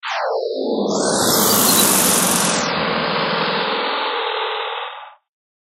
Robot Activated 00
A robot activation sound to be used in sci-fi games. Useful for robotic enemies and other artificial intelligent beings.
activated, futuristic, game, gamedev, gamedeveloping, games, gaming, high-tech, indiedev, indiegamedev, robot, science-fiction, sci-fi, sfx, video-game, videogames